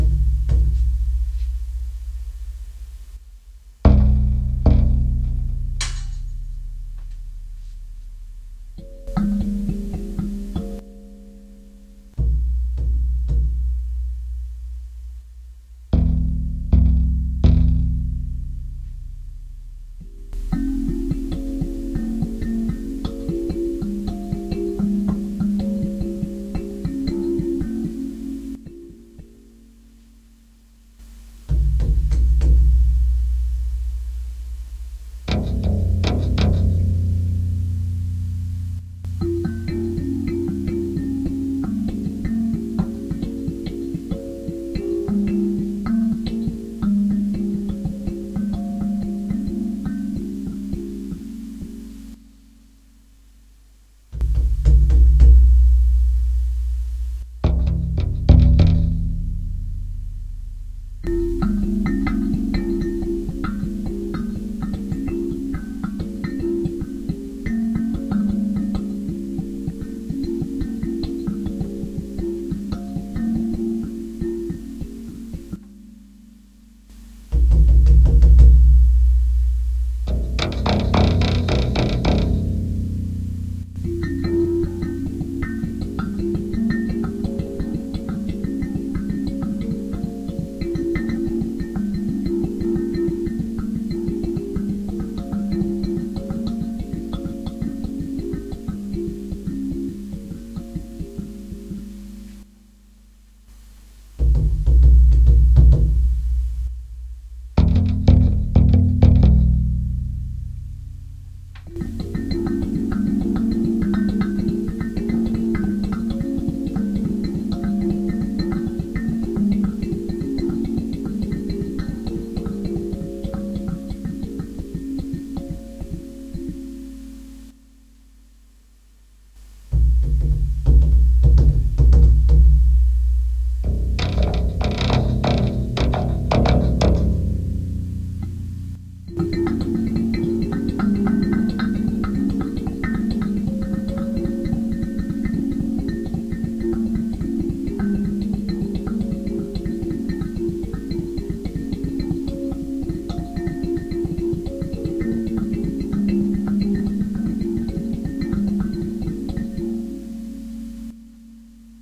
A little "opus" to honor 'Doctors without limitations'. Played on kalimba, baryton drum and own design of string instrument.
Doctors, limitations, without